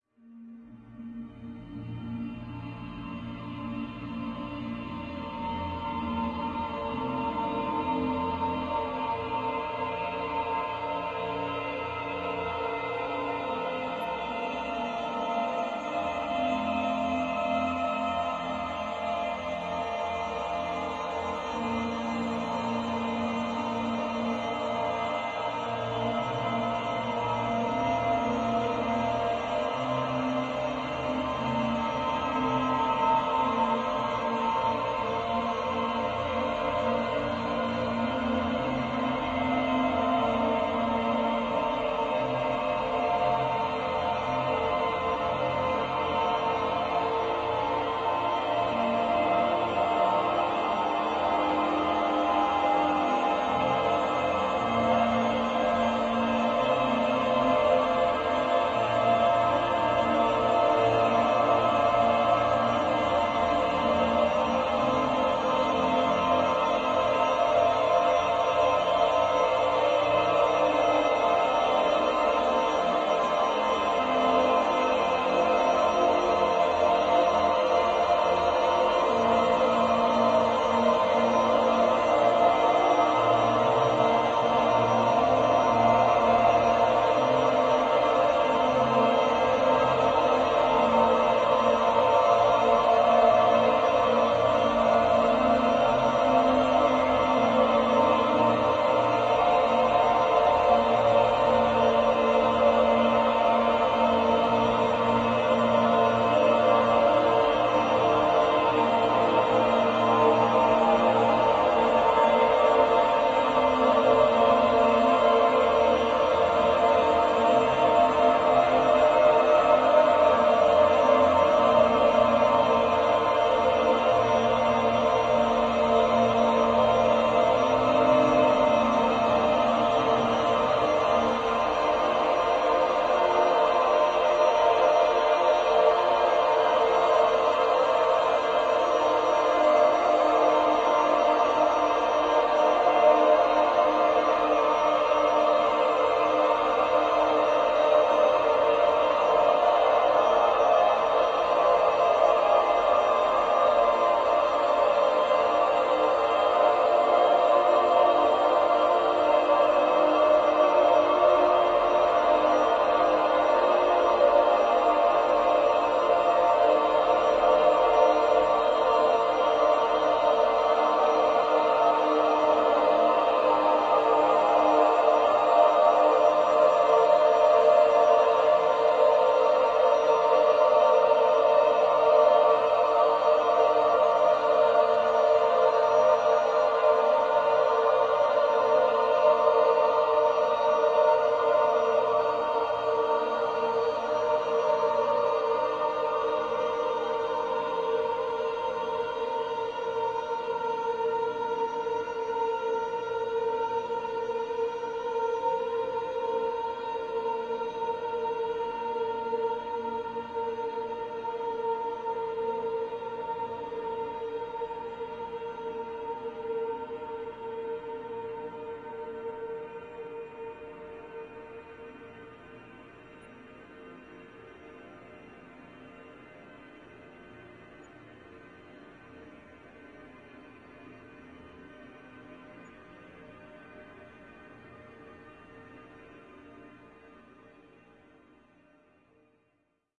LAYERS 018 - ALCHEMIC HUNTING-69
LAYERS 018 - ALCHEMIC HUNTING was created using Camel Audio's magnificent Alchemy Synth and Voxengo's Pristine Space convolution reverb. I sued some recordings made last year (2009) during the last weekend of June when I spent the weekend with my family in the region of Beauraing in the Ardennes in Belgium. We went to listen to an open air concert of hunting horns and I was permitted to record some of this impressive concert on my Zoom H4 recorder. I loaded a short one of these recordings within Alchemy and stretched it quite a bit using the granular synthesizing method and convoluted it with Pristine Space using another recording made during that same concert. The result is a menacing hunting drone. I sampled every key of the keyboard, so in total there are 128 samples in this package. Very suitable for soundtracks or installations.
drone, experimental, menacing, pad, soundscape